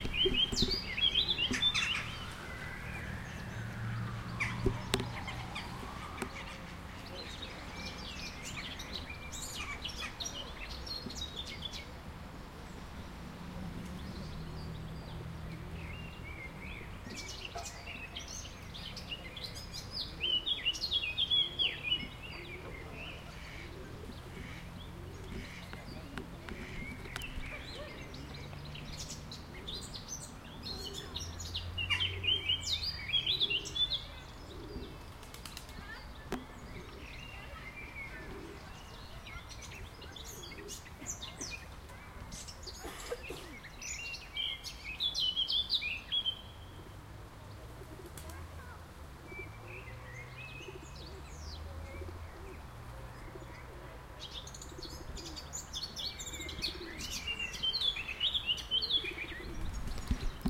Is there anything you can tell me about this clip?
Kapturka w parku
This is common forest bird - Sylvia atricapilla which is singing in the park near the river. It was recorded in Kielce in Poland with Zoom H2N (xy).
ambiance, ambience, ambient, atmosphere, bird, birds, birdsong, cantando, city, field-recording, naturaleza, nature, pajaro, park, relaxing, singing, soundscape, spring, Sylvia-atricapilla